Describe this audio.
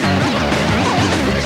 TBB = Tape Behaving Baddly
Another quality musical moment...
Recording system: Not known
Medium: Toshiba C-90T, about 25 years old
Playing back system: LG LX-U561
digital recording: direct input from the Hi-Fi stereo headphone socket into the mic socket on the laptop soundcard. Using Audacity as the sample recorder / editor.
Processing: Samples were only trimmed
poor, tape, lo-fi, poor-quality, bad, collab-2, wow, cassette, old, broken